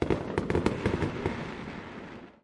Son de feux d’artifices. Son enregistré avec un ZOOM H4N Pro et une bonnette Rycote Mini Wind Screen.
Sound of fireworks. Sound recorded with a ZOOM H4N Pro and a Rycote Mini Wind Screen.
fire, firecrackers, rocket, fire-crackers, bomb, fire-works, fireworks, boom, firework, explosion, rockets